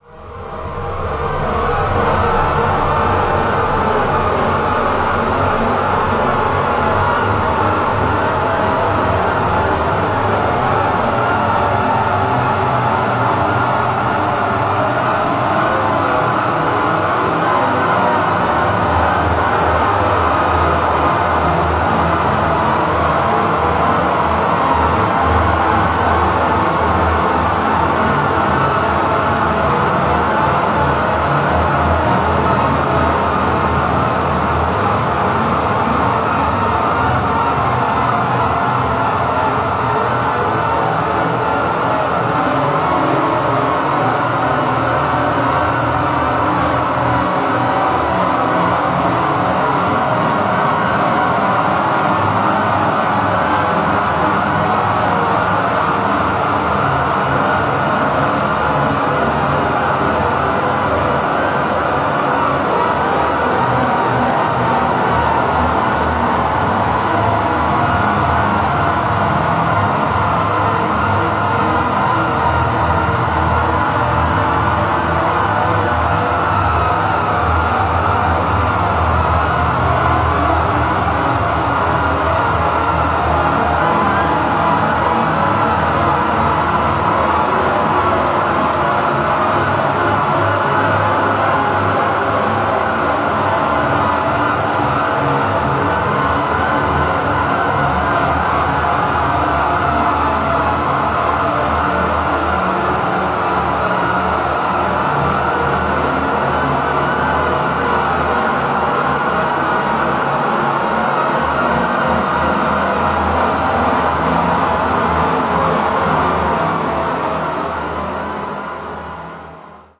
This sample is part of the "Space Machine" sample pack. 2 minutes of pure ambient deep space atmosphere. Space race car with an overheated but musical motor.
drone, experimental, space, soundscape